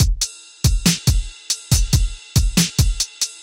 4 Beat 03 Triphop
4 Beat Drum loop for Triphop/Hiphop4 Beat Drum loop for Triphop/Hiphop
drums, beat, Triphop